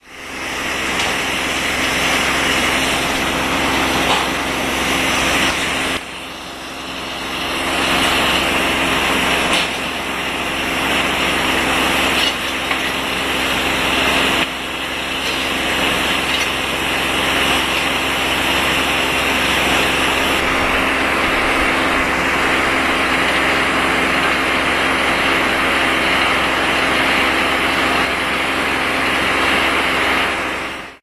technical univ building renovation240910
24.09.2010: about 20.40. the sound of the huge generator using by workers on the Technical University Campus area. during the Science Night event.
workers, renovation, poland